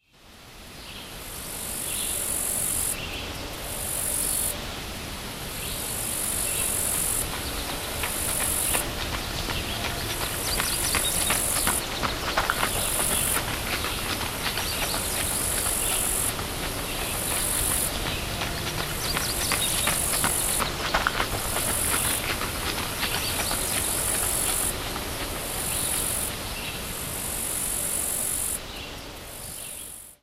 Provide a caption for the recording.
Joggers running on a gravel trail at Moraine Hills State Park in Illinois. There are ambient nature sounds like birds and crickets you could probably edit out and loop.